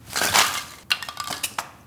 20180315.foam.loop.pattern06
noises produced by fragments of polystyrene that hit a solid surface. Sennheiser MKH60+MKH30 into SD MixPre-3 (M/S stereo)